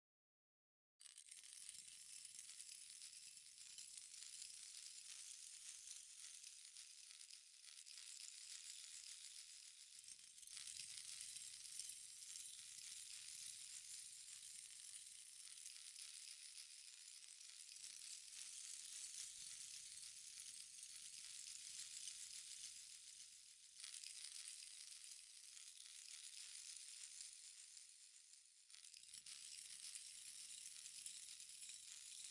BZH Trumpet Rain
Comes from a recording of tapping on a C trumpet with fingernails and transposing it up many octaves. Layered with itself many times, a bit of granulation added to create additional layers.
experimental, extended-techniques, rain, trumpet